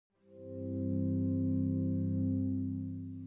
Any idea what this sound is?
recorded for our Ludum Dare 46 game using Sega Genesis-inspired synths to invoke healing, gaining health